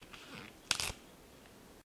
Tearing noise
break, noise, Tearing, tear